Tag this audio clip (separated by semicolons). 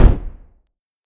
Gunshot; Meaty; Underwater